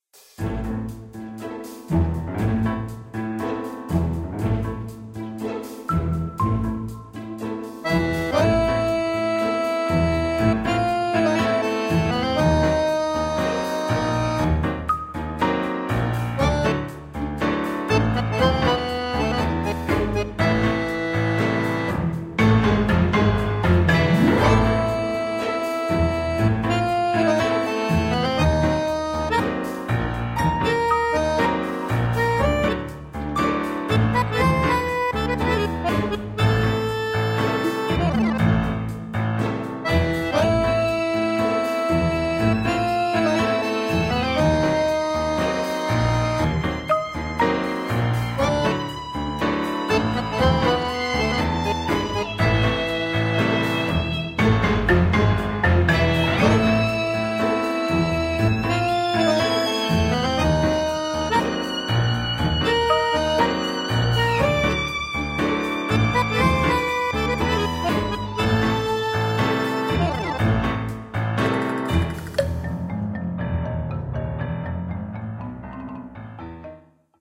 Passion Tango with fascinating rhythm and a strong character.
It will be good for films, advertisings, multimedia projects, podcasts.
Thank you for listening
Thank you for your support!
My Life Is Music
love, energetic, accordion, dance, latin, piano, tango